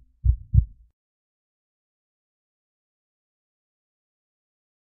This is a heartbeat sound.
heartbeat, human, sounds